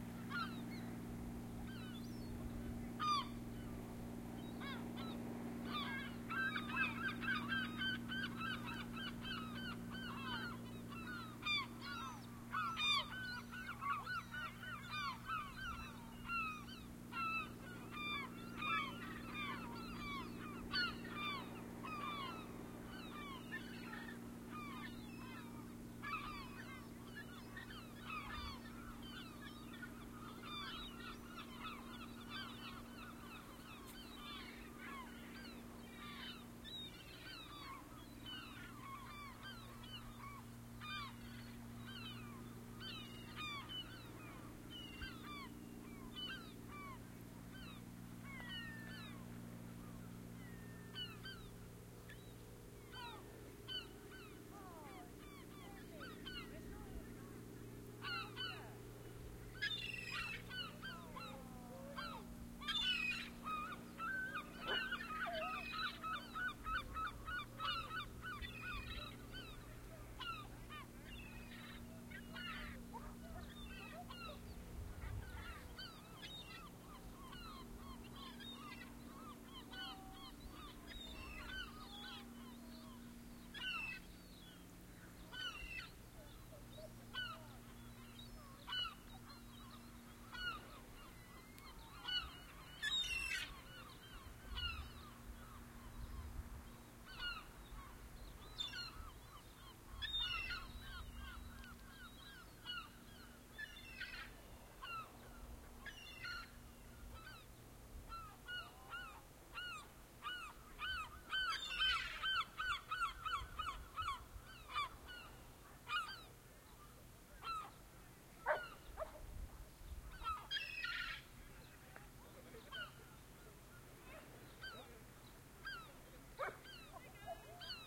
One of my favourite townbeaches: the one in North Berwick. You hear some seagulls and people on the beach. OKM microphones with A3 adapter into R-09HR recorder.
field-recording, north-berwick, gulls, scotland, seagull, binaural, seagulls, seaside, beach